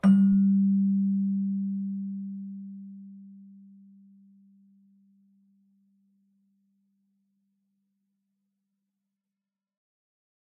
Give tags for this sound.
bell; celesta; chimes

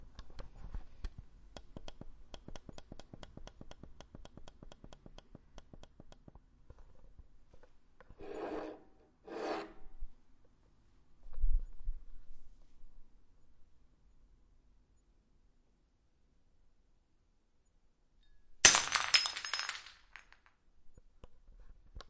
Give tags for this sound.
breaks; ceramic; cup; floor; solid

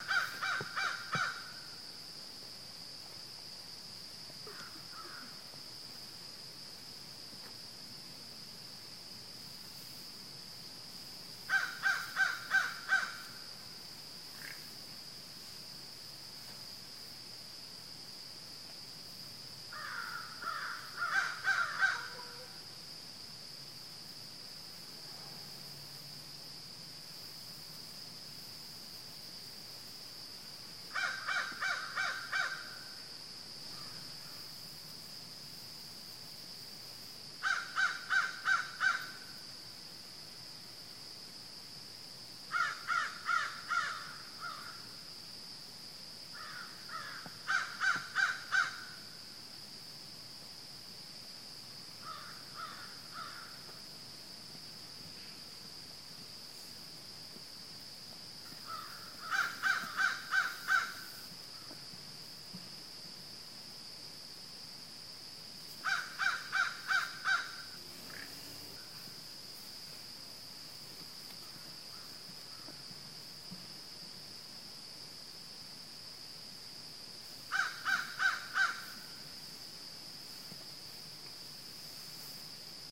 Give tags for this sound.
crickets; crows

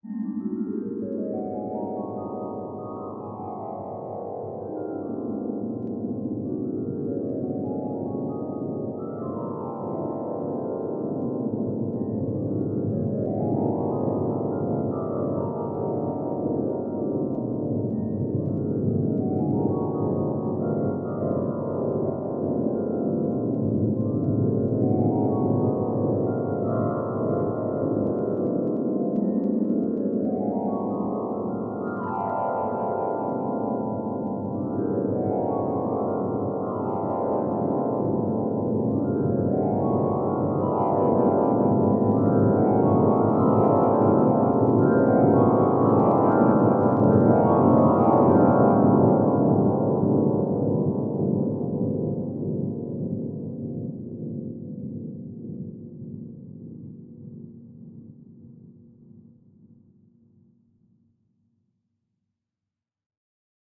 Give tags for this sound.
movie; flashback; background-sound; music; scary; horror; atmosphere; background; dramatic; mood; thriller; tension; drama; suspense; film; dream-sequence; spooky; soundscape

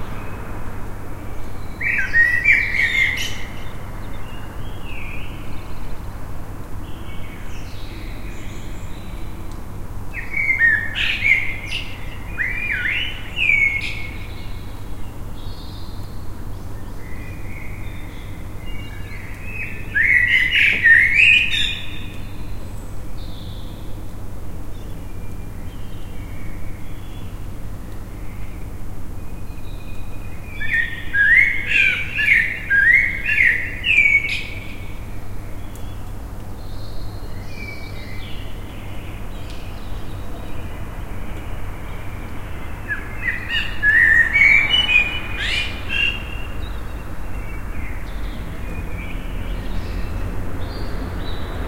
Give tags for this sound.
field-recording; night; york; birdsong; blackbird

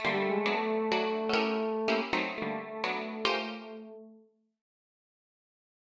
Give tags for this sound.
string; experimental; cafe; pluck; guitar; strings; lounge; loop; arabic; restaurant

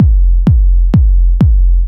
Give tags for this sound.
128bpm; bass; bassdrum; bd; bigroom; drum; drumloop; house; kick; loop; tuned

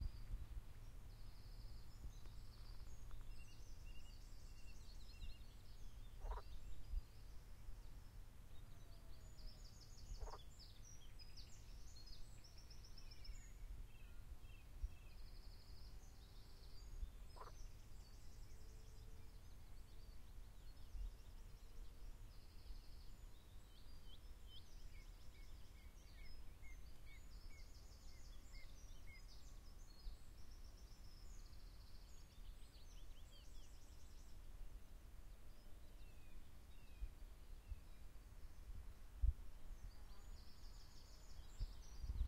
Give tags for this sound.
field-recording; croak; forest; ambient; spring; frogs; croaking; night; nature; toads; ambiance; pond; ambience; toad; birds; frog

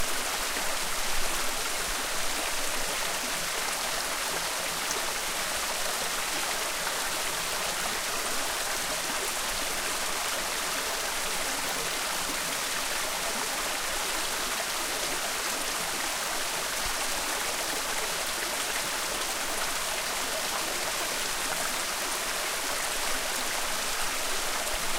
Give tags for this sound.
waterfall; thailand; ambient; nature; birds; stream; lapping; ambience; stereo; field-recording; island; cicada; jungle; water; tropical; mild; rainforest; exotic; river; bird; forest

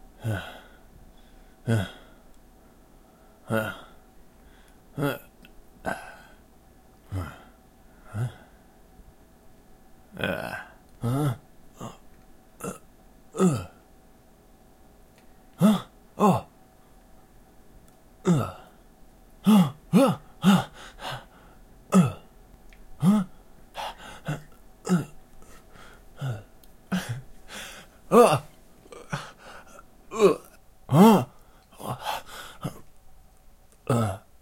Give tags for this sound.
breath; breathing; breathing-sound; breathing-sounds; breath-sound; breath-sounds; grunt; grunting; grunting-sound; grunt-sound; grunt-sounds; human; male; man; pain; run; running; shock; shocked; speech; spoken; surprised; surprised-sound; surprised-sounds; tired; ugh; vocal; voice; wince; wince-in-pain

